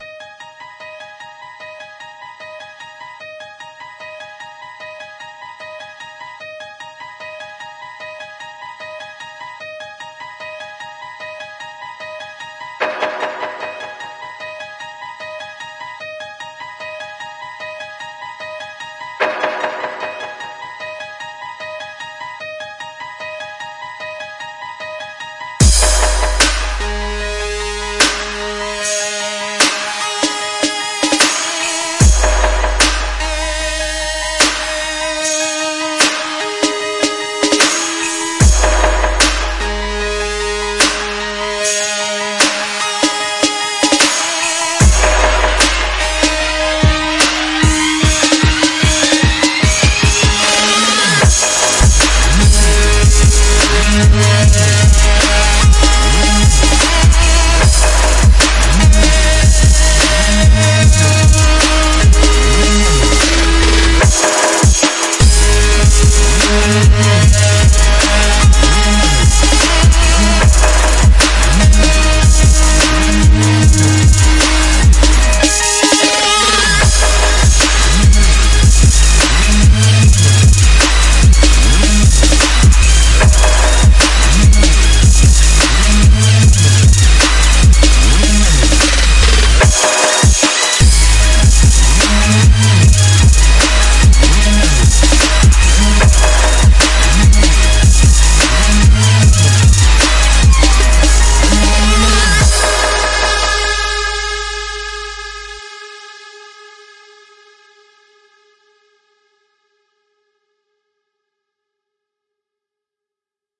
Trap Loop 1 (nemesis)
Melody, Trap